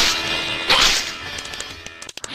Corrupted Glitch2
corrupted glitch sound from a video game